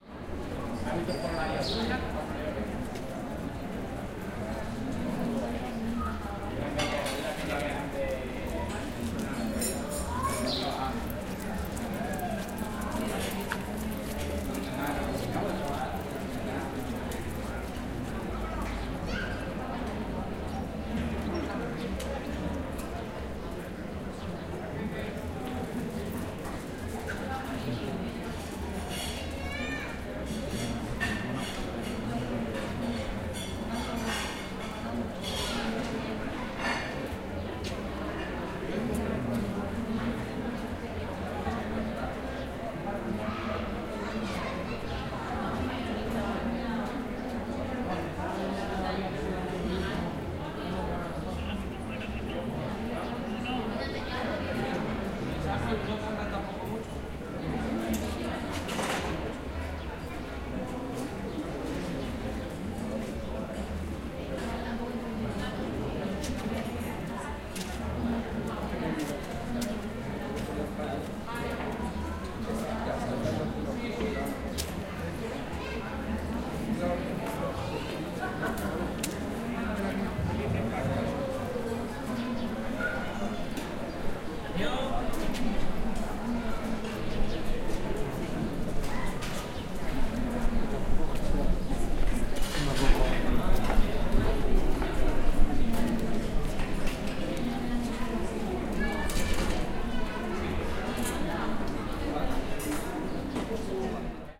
0222 Bar terrace 2
People in the terrace of a bar talking in Spanish. Birds. People walking.
20120324